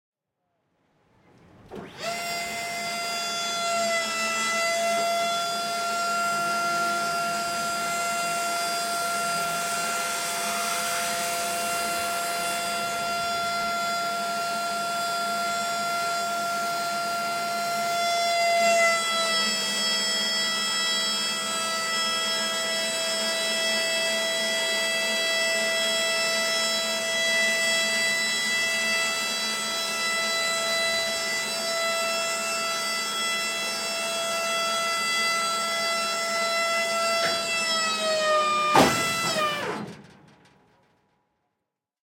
Nike Hercules missile being lowered from launch position. Recorded on August 2, 2008 at launch site SF-88L, one of the hundreds of US Army Nike missile batteries that formed rings around major US cities and other strategic locations from 1954-1974. Nike missiles, which could be equipped with high explosive or moderate-yield nuclear warheads, were intended as last resort defense against Soviet bomber attack.
Site SF-88L is in the Marin Headlands of California (near San Francisco) and has been preserved as a museum staffed by volunteer Nike veterans. The bunker elevator and launch platform have been restored to operating order, and visitors can watch as a missile (sans warhead, we're assured) is raised from underground and into its launch position in less than a minute.